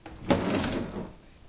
drawer open 2
Sliding the cutlery drawer open
drawer, cutlery